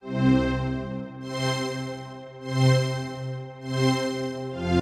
100 Concerta String 04
layer of string